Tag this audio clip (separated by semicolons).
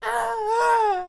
bits; reaper; 16